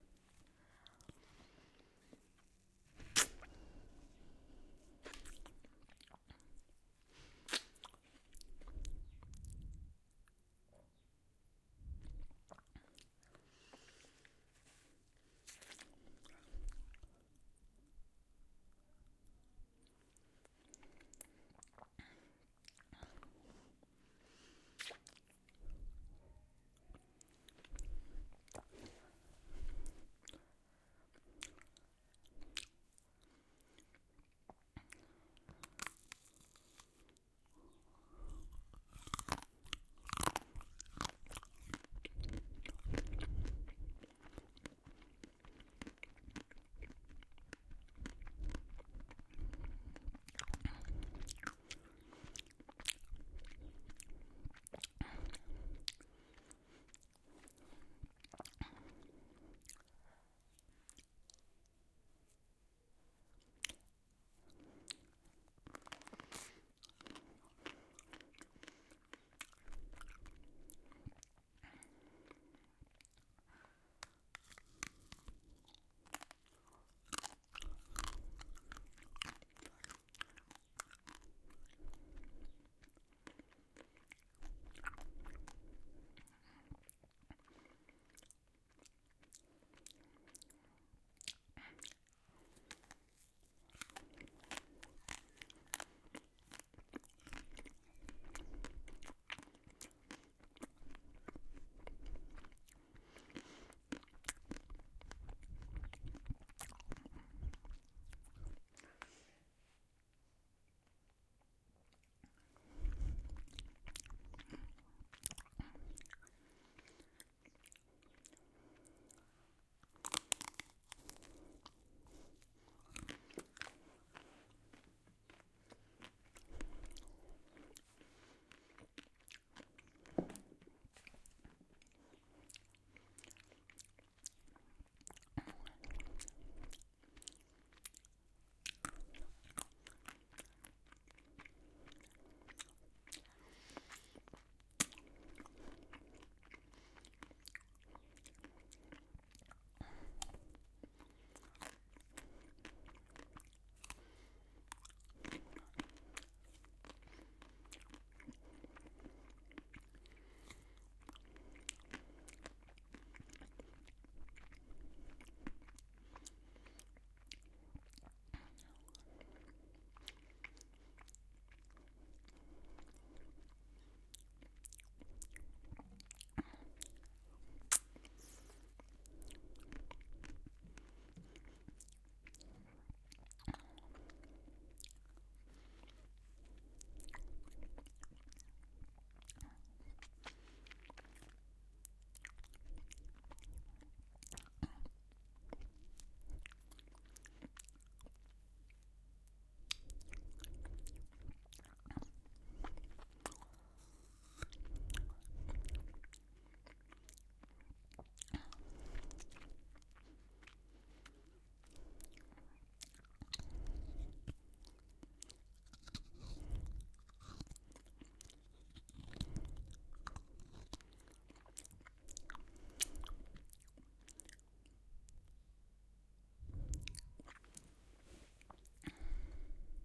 Eating a chocolate coated ice cream that turns into soft ice cream on a stick.
creamy, licking, cream, sucking, snack, munch, eating, frost, suck, slurp, cracking, lick, crunch, squelch, break, eat, crack, ice, OWI, bite, consume, food, soft, chruncy